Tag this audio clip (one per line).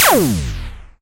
shot
laser
action
classic
videogame
shooting
retro
spaceship